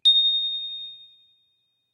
Richcraft - chime 4 20181219
I struck a single rod from one of my wind chimes with a wood stick.
ding, bell, tone, chime, singlenote